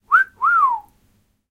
Wolf Whistle, Fast, A (H6 MS)
Raw audio of a fast wolf whistle. Recorded simultaneously with the Zoom H1, Zoom H4n Pro and Zoom H6 to compare quality.
An example of how you might credit is by putting this in the description/credits:
The sound was recorded using a "H6 (Mid-Side Capsule) Zoom recorder" on 17th November 2017.